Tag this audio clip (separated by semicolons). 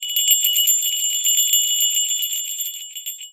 bell; bronze; copper; jingle; small